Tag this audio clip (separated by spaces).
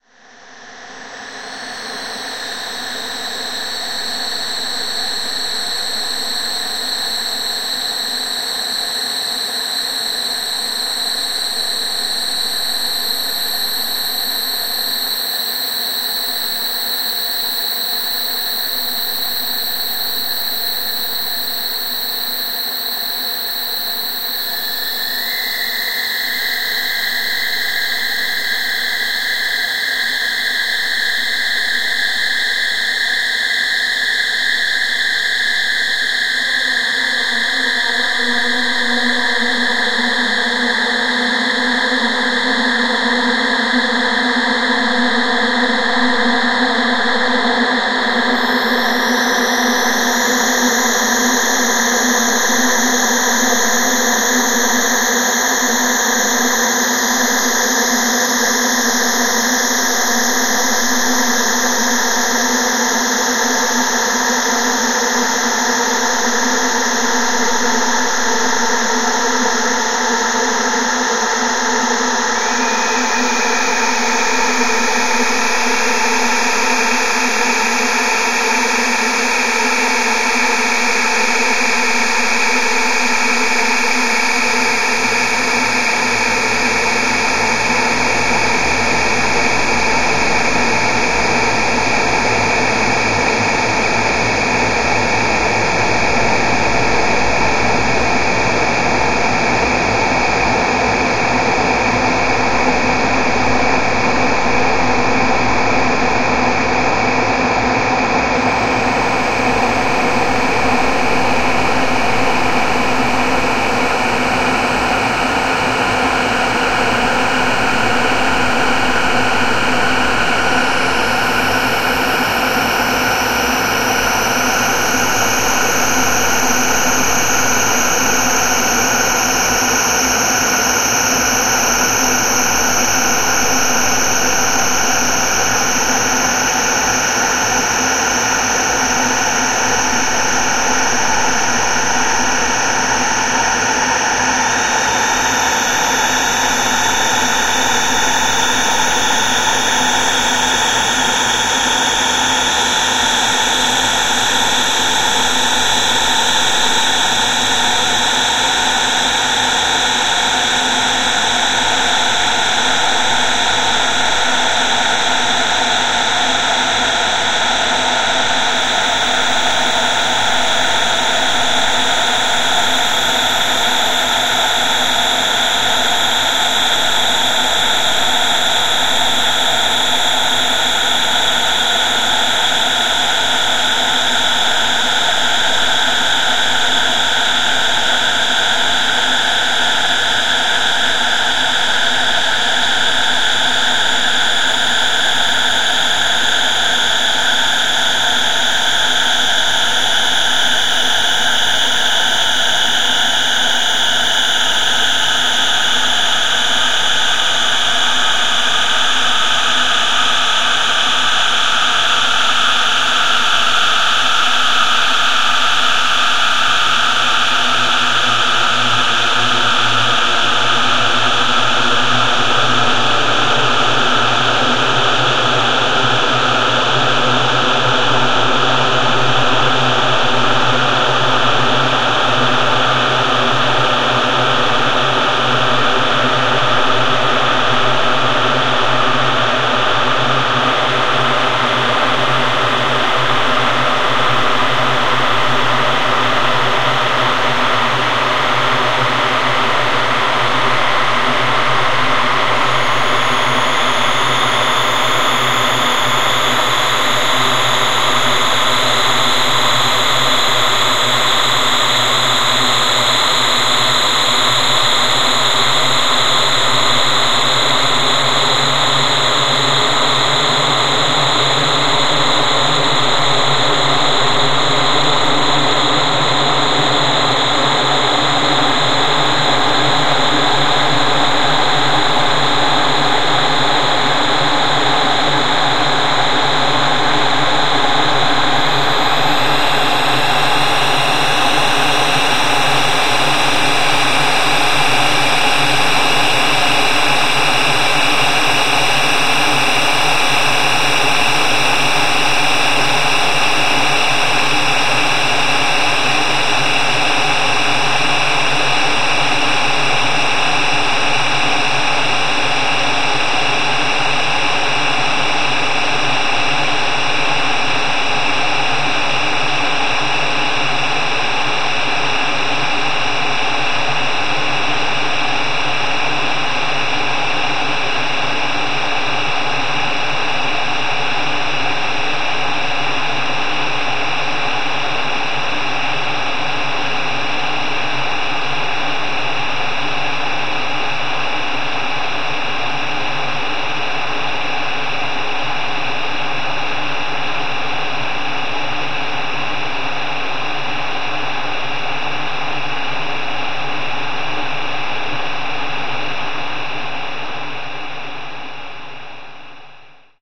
ambient drone reaktor soundscape space